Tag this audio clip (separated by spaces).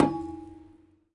block hit Metal resonance wooden